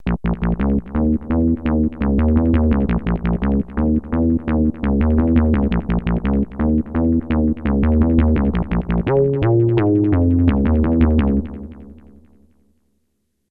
digibass loop 170bpm
kinda funky with added delay and progression resolve
funky, bass-loop, bassloop, bass, digital, dirty